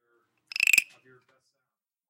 frog croak
Sound of a wooden frog "croak" recorded in MUST 121 with Alexander Moss